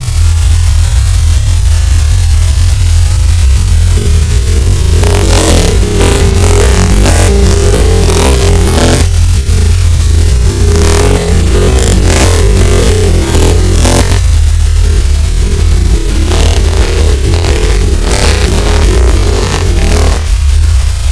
UFO xd
A deep base sound UFO sound effect created with manipulated waveform generated sounds. However, if you decide to use this in a movie, video or podcast send me a note, thx.
space, bass, ufo, waveform, weird, eerie, sound, deep, ufo-sound, otherworldly